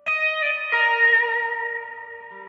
A solo guitar sample recorded directly into a laptop using a Fender Stratocaster guitar with delay, reverb, and chorus effects. It is taken from a long solo I recorded for another project which was then cut into smaller parts and rearranged.